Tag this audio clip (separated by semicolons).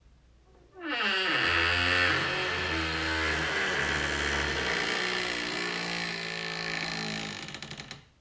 Open; Close; door